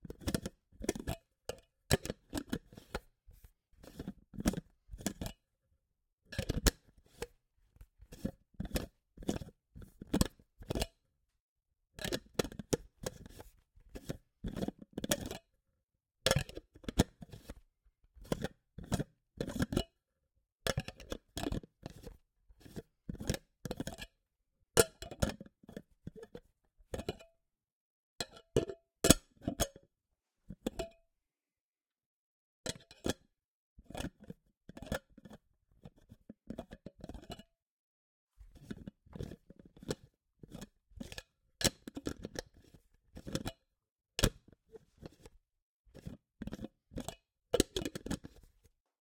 20180428 Opening and closing a small, metal coffee canister
can, canister, container, Foley, handling-noise, metal, metallic, onesoundperday2018, tin